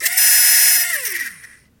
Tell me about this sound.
Toys-Borken RC Helicopter-12
The sound of a broken toy helicopter trying its best.
gear, buzz, motor, whir, machine, helicopter, toy, broken